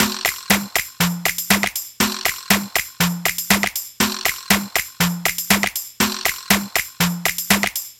just a short drum/percussion loop :)
dubstep, percussion, drums, congas, loop, 120bpm, drum, synthesizer